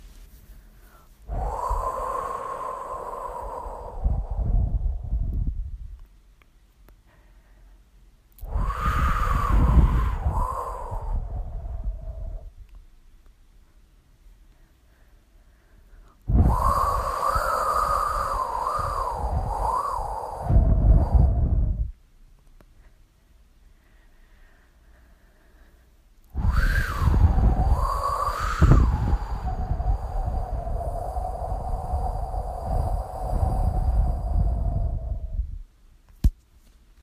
Wind long
wind breeze swoosh air gust